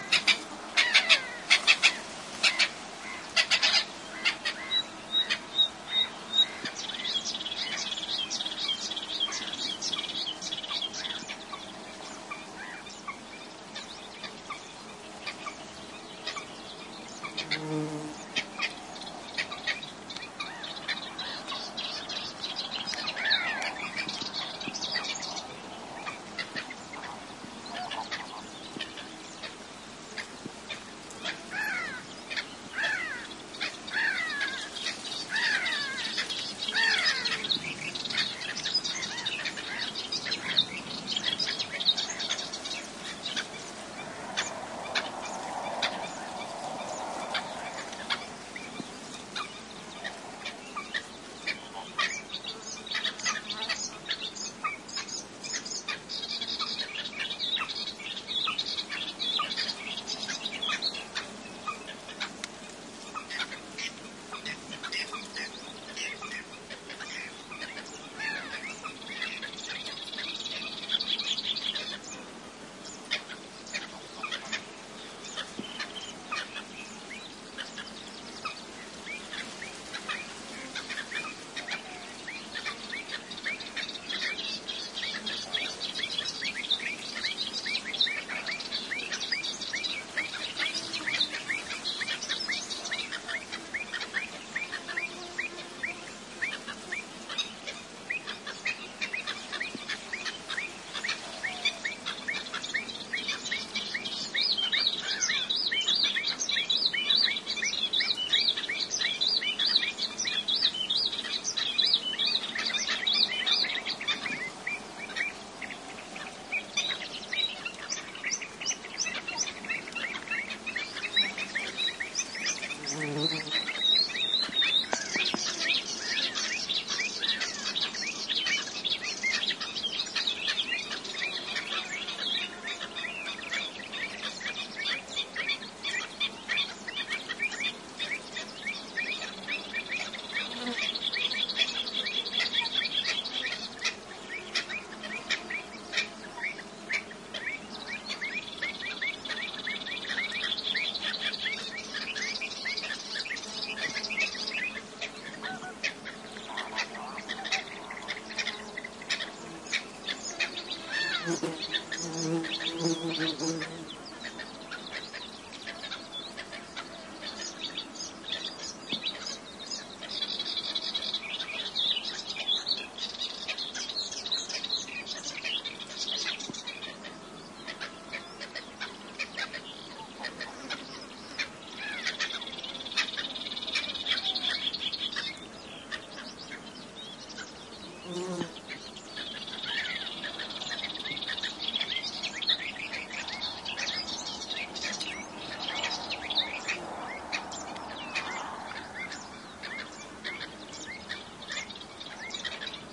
20130523 melodious.warbler.01
Bird callings (Melodious Warbler, Mediterranean Gull, Black-winged Stilt, Common Coot) and insect buzzings. Recorded near Laguna Ballestera (La Lantejuela, Sevilla Province, S Spain) using Primo EM172 capsules inside widscreens, FEL Microphone Amplifier BMA2, PCM-M10 recorder
gull, field-recording, Himantopus-himantopus, pond, spring, Fulica-atra, zarcero, birds, Larus-melanocephalus, Spain, Hippolais-polyglotta, warbler